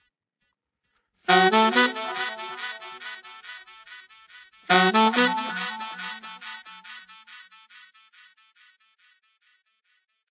DM 140 F# SAX RIFF DELAY
DuB, HiM, Jungle, onedrop, rasta, reggae, roots